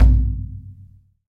Frame drum oneshot RAW 15
Recording of a simple frame drum I had lying around.
Captured using a Rode NT5 microphone and a Zoom H5 recorder.
Edited in Cubase 6.5
Some of the samples turned out pretty noisy, sorry for that.
deep, drum, drumhit, drum-sample, frame-drum, hit, low, oneshot, perc, raw, recording, sample, simple, world